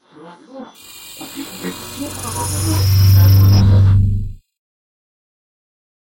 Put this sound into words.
radio shudders20x
grm-tools, radio, shudder, sound-effect